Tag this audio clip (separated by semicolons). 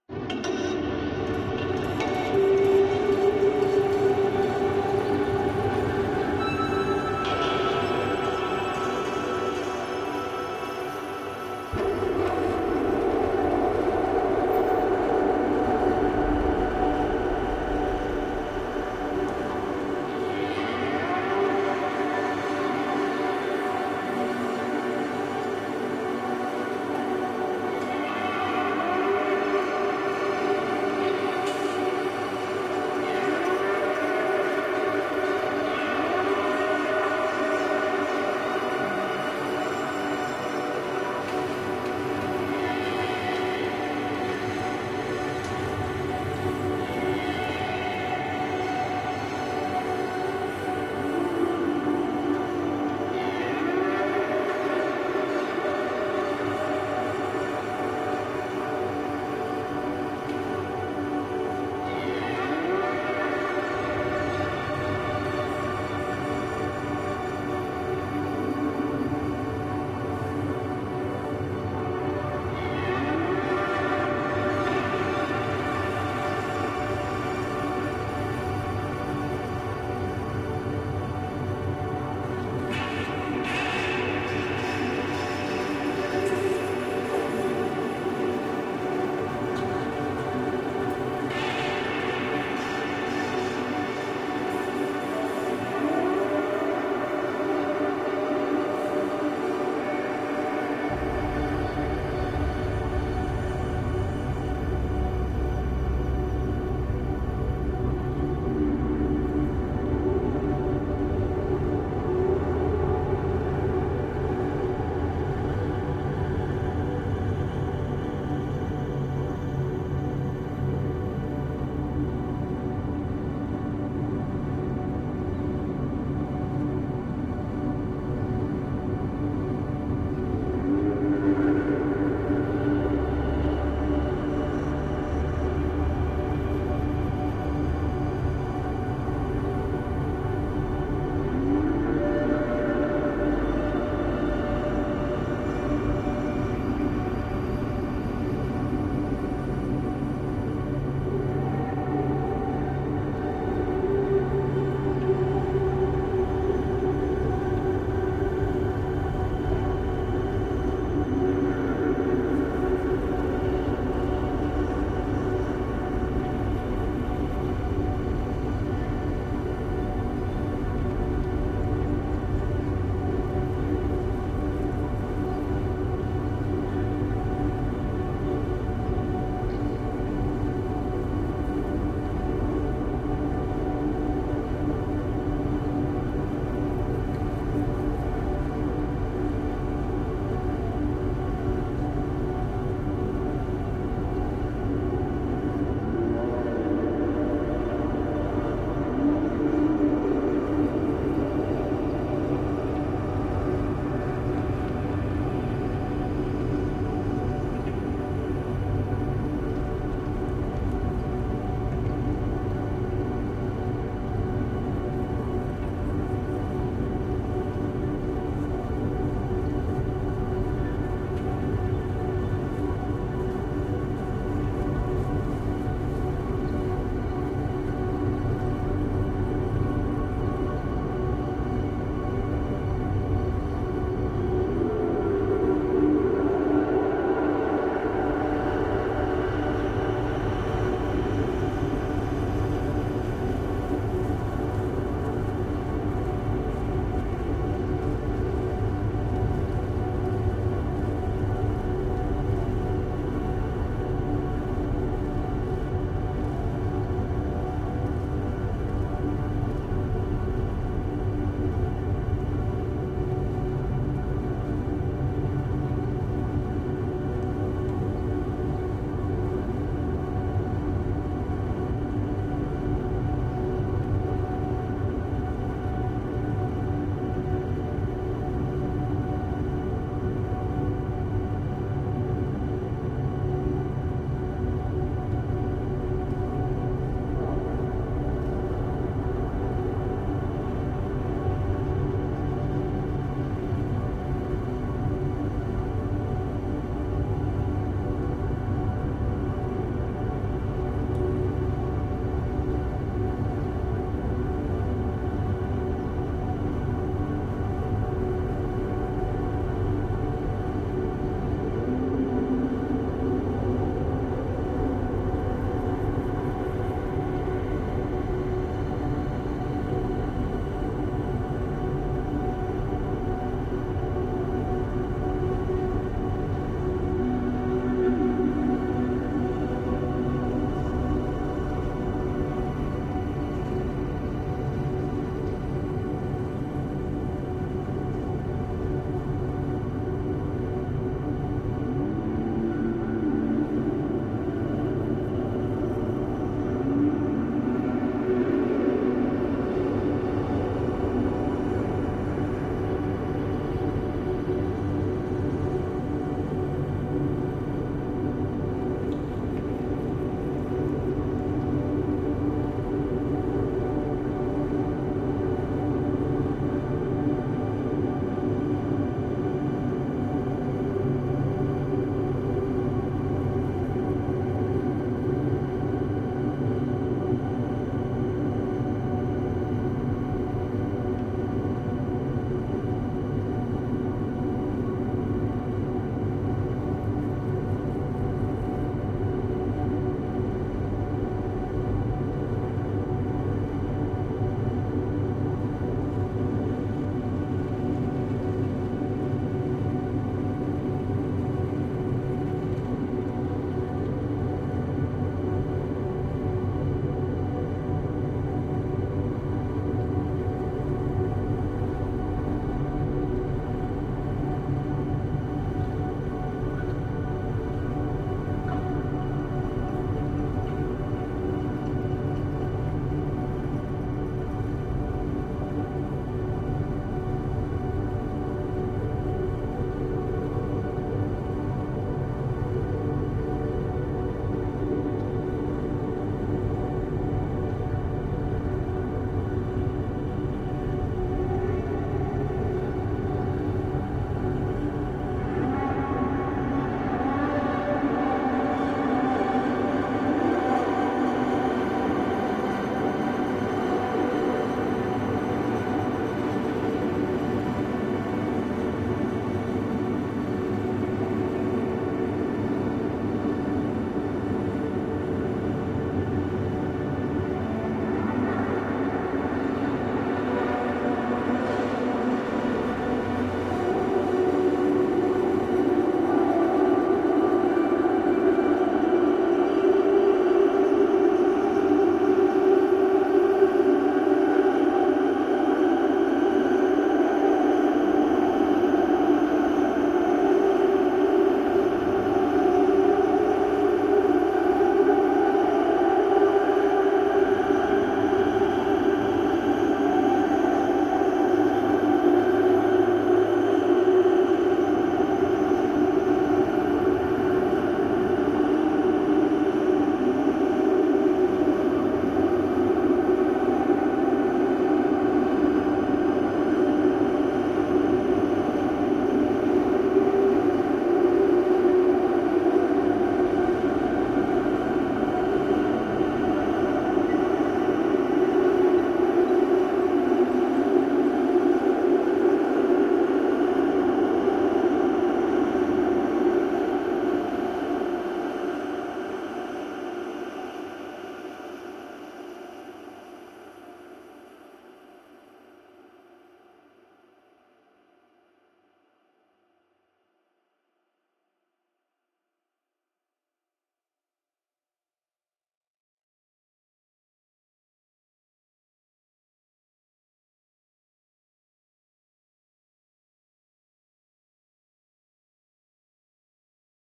ambient; artificial; cows; drone; experimental; horses; musical; pad; soundscape